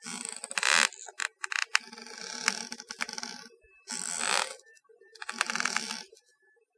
Very slow squeaks from shifting back and forth, perhaps sounds like an old porch swing or something.Recorded with a Rode NTG-2 mic via Canon DV camera, edited in Cool Edit Pro.